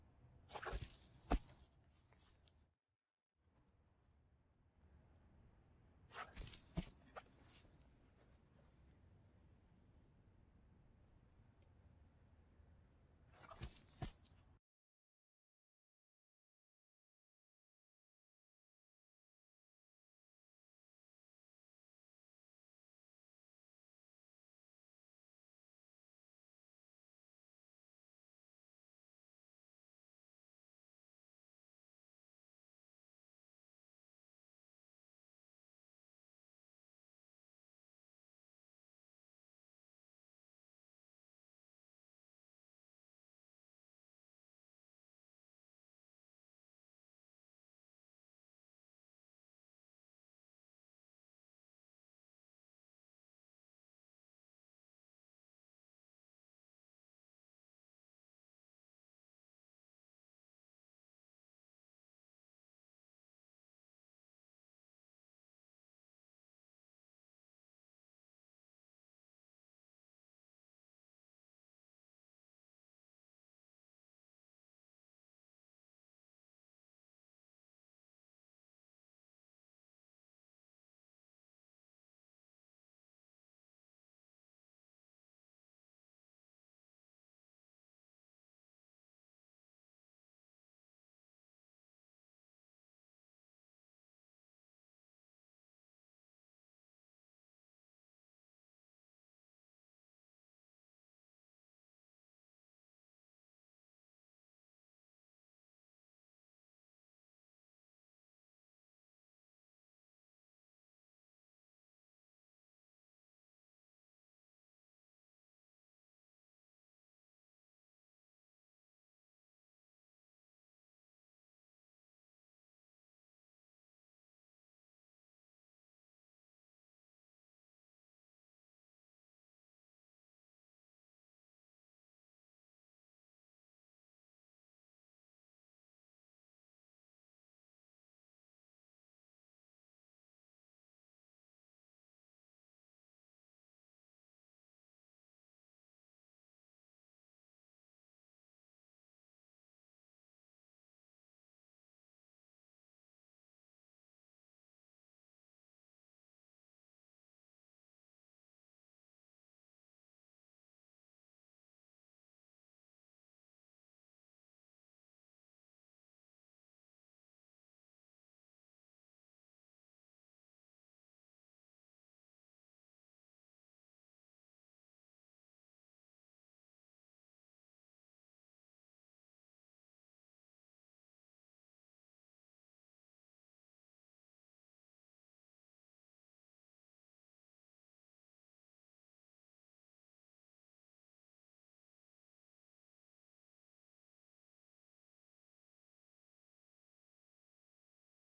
Falling On Wet Grass
slipping on wet grass
fall, grass, slip, trip, wet